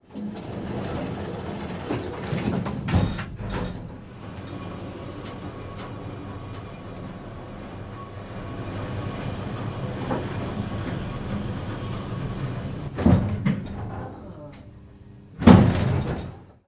BASTIEN Samantha 2014 2015 DownElevator
Ascenceur descendant
- Description of production -
Tittle : Down Elevator
Sound : Micro Canon Audio-technica / Audacity
Contents : recording of elevator down, 17", in the source
Effect : Normalize -0,1dB (no saturation), Fade In, Fade Out, up sound envelope, Pan center
- Typologie -
Code : complexe d'entretien continu (Y)
- Morphologie -
Masse : piqué choc
Timbre harmonique : mécanique
Grain : rugueux
Allure : roulement
Dynamique : faible
Profil mélodique : bruit
Profil de masse : (site) grave (calibre) fond amplifié